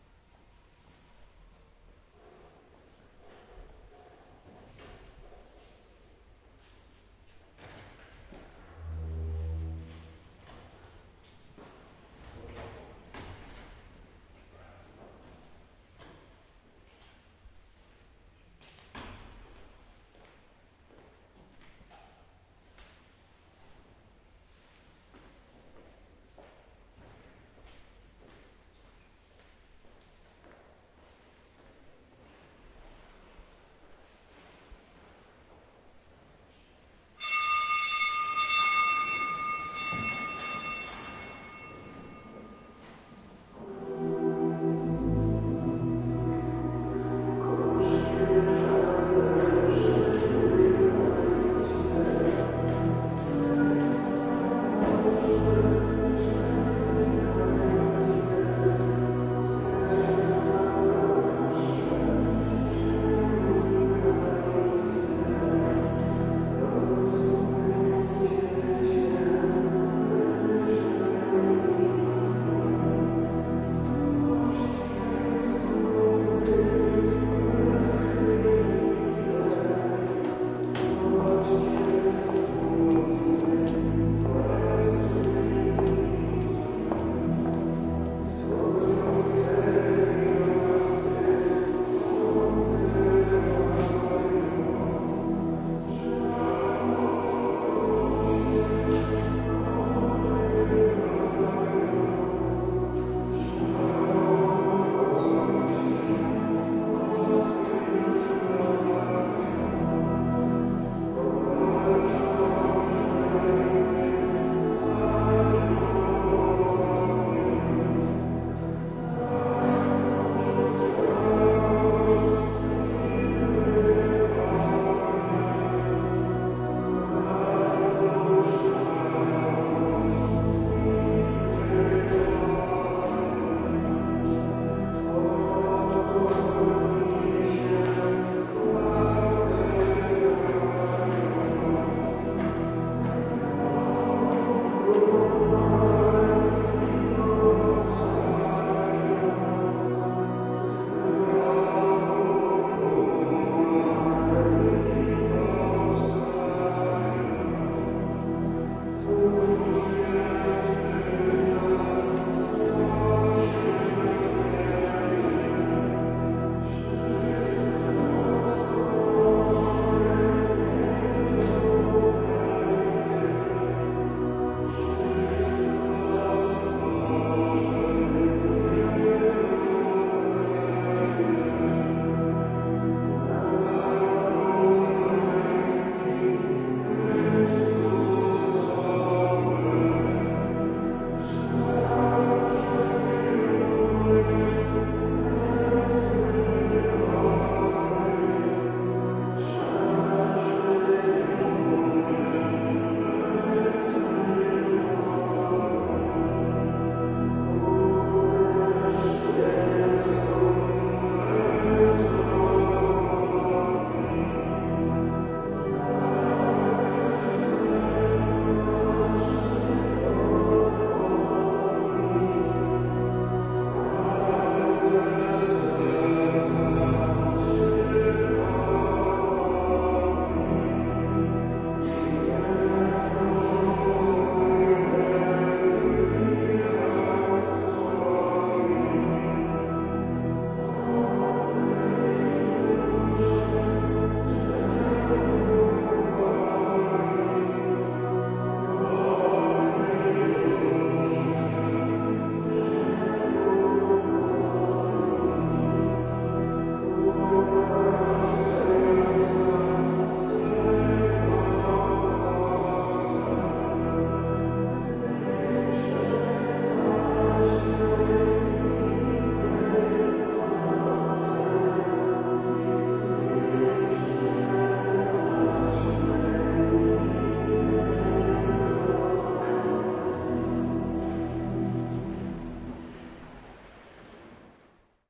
Bitter Lamentations 180312
18.03.2012: about 6 p.m. Beginning of so called Bitter Lamentation - Polish prayer singing during Lent. Lyrics are heartbreaking - sad, bloody, mystic. The Saint Antoni Paderewski Church - Franciszkanska street in the center of Poznan i Poland.
bitter-lamentation, catholic, church, field-recording, music, organ, pipe-organ, Poland, Poznan, prayer, priest, silence, singing, song